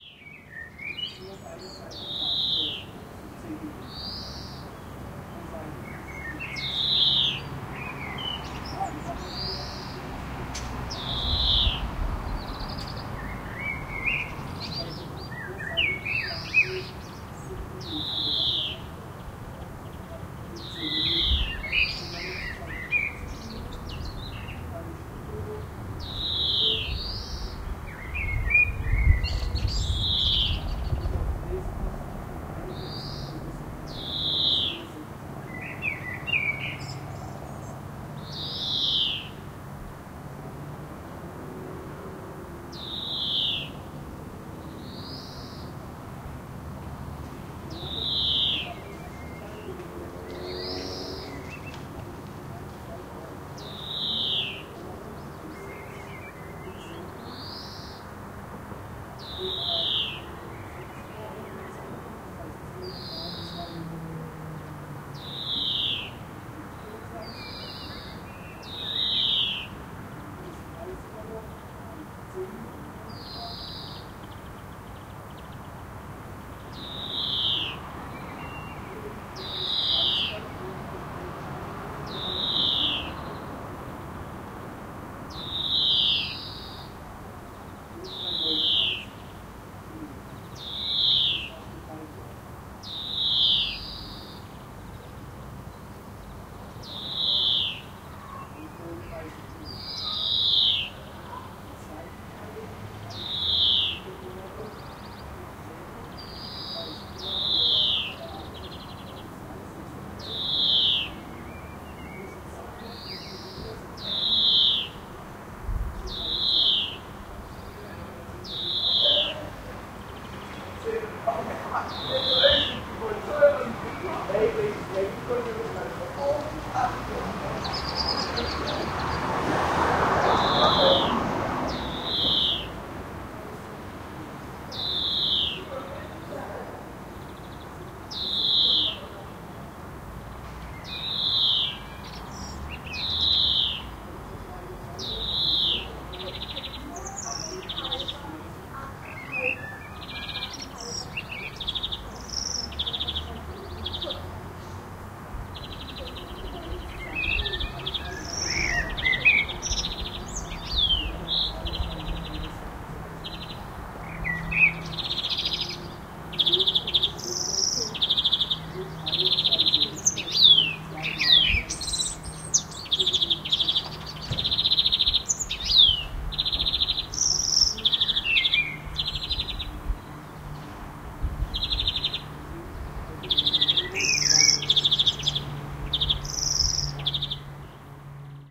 birds voices afternoon
Stereo recording of a sunny spring afternoon in Karlsruhe (Germany).
Recorder: Zoom H2
afternoon, birds, cars, karlsruhe, kids, spring, suburban, suburbia, voices